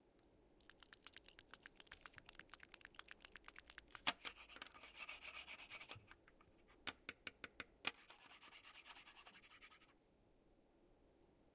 mySound Piramide Basima
Sounds from objects that are beloved to the participant pupils of the Piramide school, Ghent. The source of the sounds had to be guessed.